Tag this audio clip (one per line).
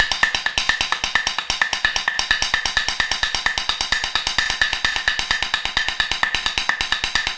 130-bpm
acoustic
ambient
beam
beat
beats
board
bottle
break
breakbeat
cleaner
container
dance
drum
drum-loop
drums
fast
food
funky
garbage
groovy
hard
hoover
improvised
industrial
loop
loops
lumber
metal
music